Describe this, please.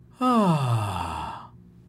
Male Ahhhh
Male voice relief sound - relaxing